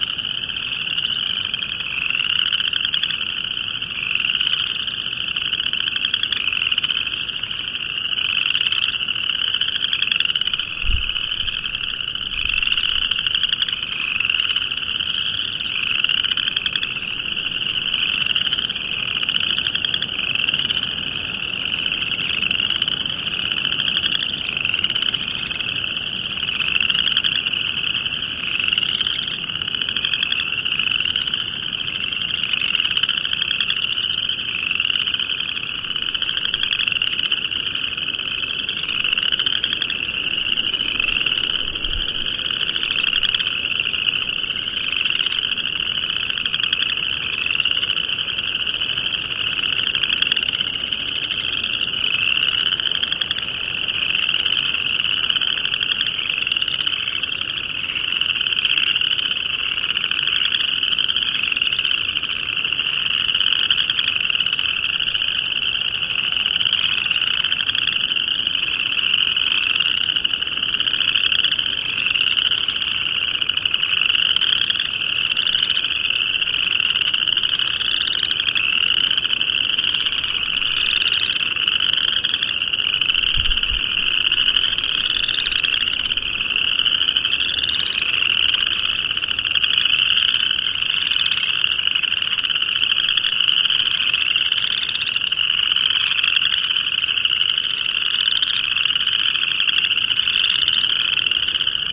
Spring Peeper Frogs
A loopable recording of the riotously loud spring peepers at Bobolink trail in Boulder, Colorado. Lots of wind as well, which could be removed with a high pass filter. Spring 2016. Made with zoom h4n.